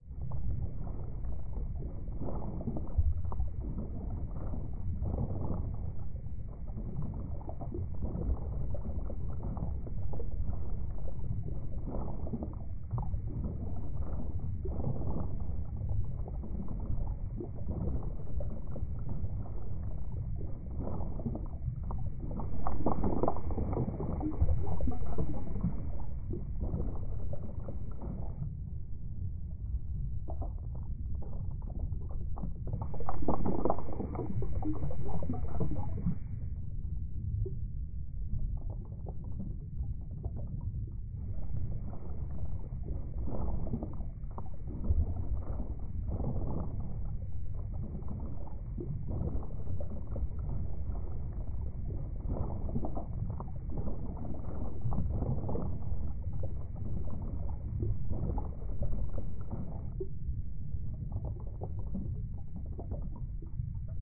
A simulated underwater ambience. Recorded in a sound booth with a pair of Apex 185 condenser mics. Transformed in Reaper DAW.
Will loop nicely with a 2 sec crossfade.
water, background, low-pass, ambient, underwater, ocean, ambience